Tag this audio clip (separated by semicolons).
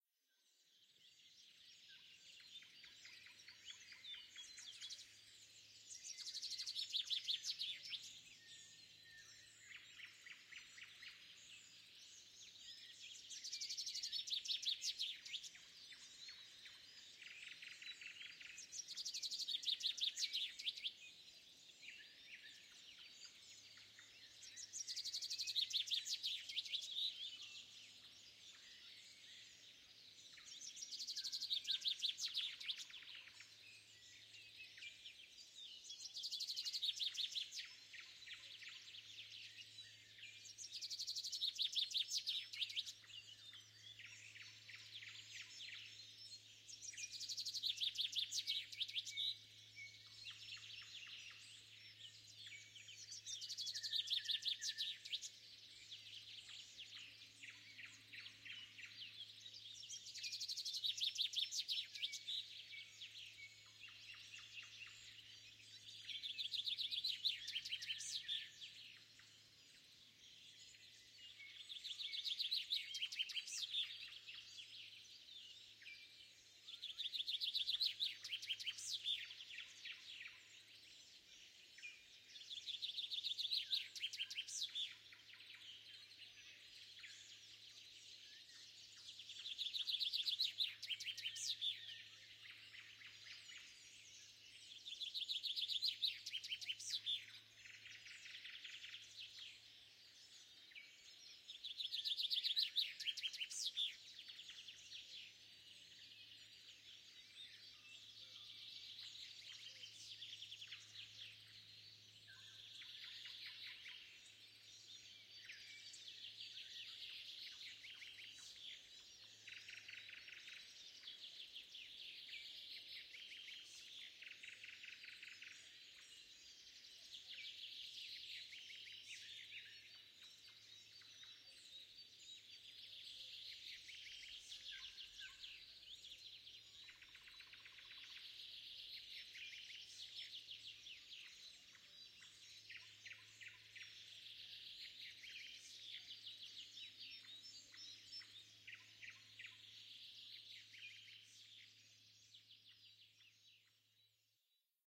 forest; morning; birds; nature; spring; birdsong; field-recording; bird